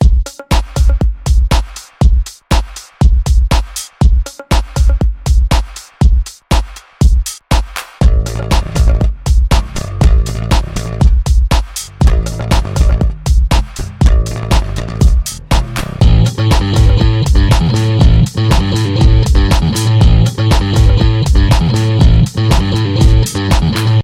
Bad Happy Porn song - you know it, you have heard it before.
Little song loop made with Garage Band.
Use it everywhere, no credits or anything boring like that needed!
I would just love to know if you used it somewhere in the comments!
atmosphere, porn, kinky, music, synth